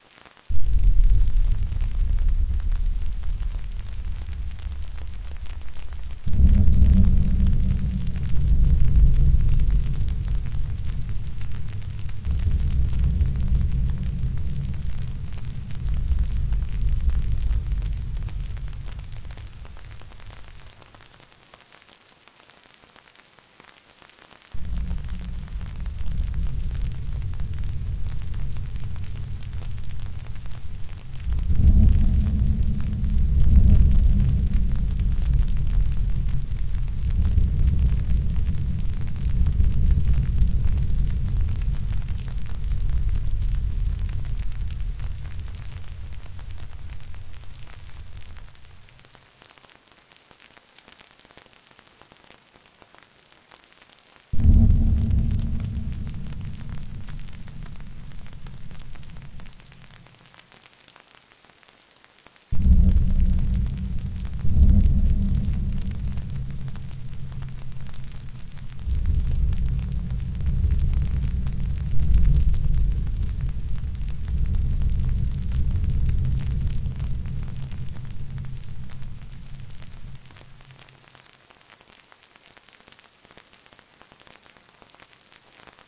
Combined a recording of a stun gun/taser using FM in Ableton's Granulator II with some low heavy banging recorded during some renovation at IPR. Everything was put through a Convolution Reverb in the end.
Ableton, Ambience, Ambient, Environment, FieldRecording, FM, Nature, Rain, Rumble, Sample, Storm, Synthesis, Thunder, Weather